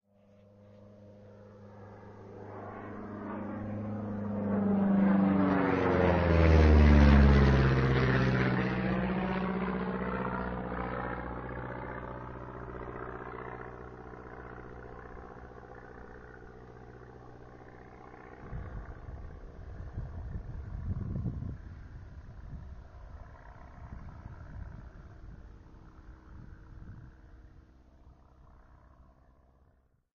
After checking my mail I was walking back to the house and heard this plane approaching. I always carry my Yamaha Pocketrak and got it going just in time to catch this low flyover. It actually reminded me of a warplane flying over. I also left in the wind sounds that sort of sound like distant artillery. Thanks. :^)